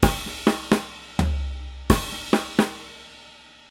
Acoustic drumloop recorded at 130bpm with the h4n handy recorder as overhead and a homemade kick mic.
acoustic, drumloop, drums, h4n, loop